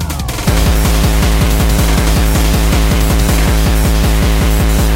Terror Kicks 2
distorted, distortion, gabba, gabber, hardcore, kick, terror